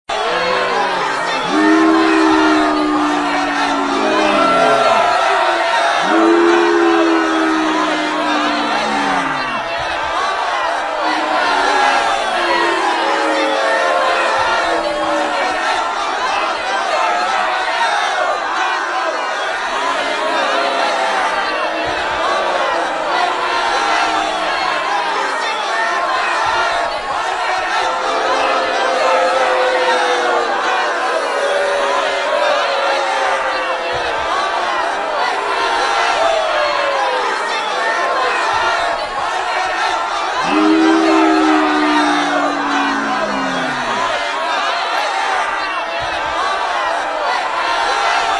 Booing Crowd
An audience booing.
boo, concert, audience